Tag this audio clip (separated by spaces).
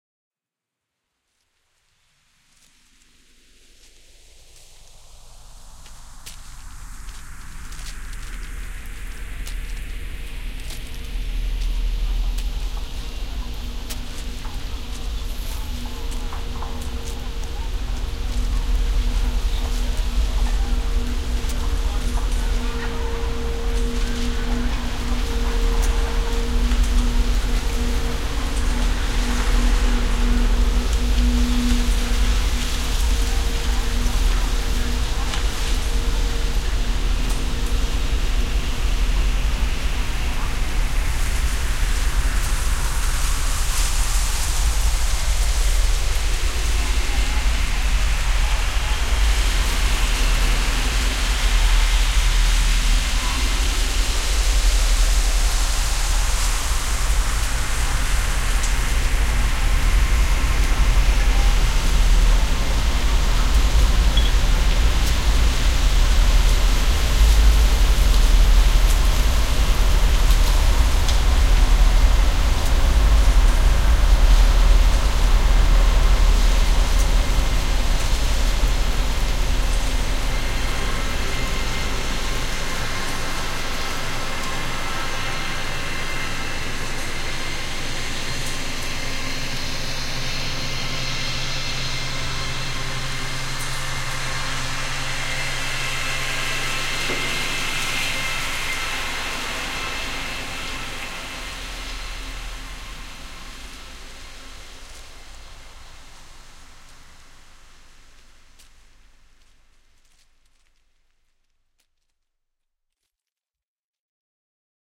atmosphere processed recording competition earth